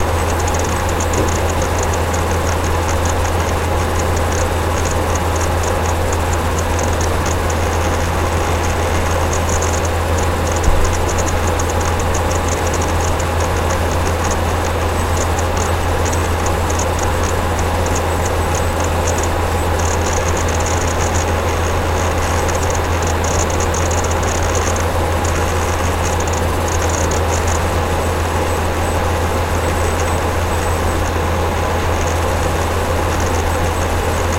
Laptop hard drive noise, dell N5040
Recorded using the internal microphone of a dell n-5040, I managed to capture the sounds of the hard drive noise this machine makes, as well as some fan noise.
5400-rpm, dell, drive, fan, hard, hum, humming, internal, laptop, machine, motor, noise, storage